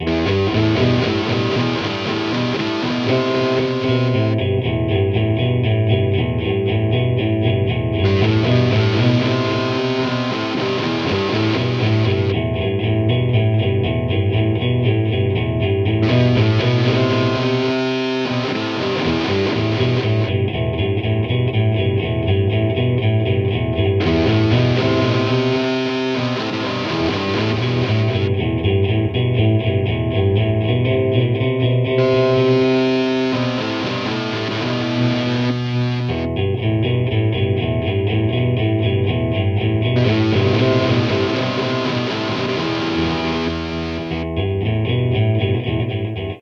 Switched Amp Guitar 2
Two instances of Stereo Trance Gate where used in parallel as volume envelopes, effectively panning the signal between two different amps. The result is a little riff where the tone of the guitar changes during the riff.
amp, amplifier, effect, electric-guitar, gate, gated, guitar